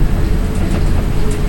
elev loop
moving, elevator, lift, loop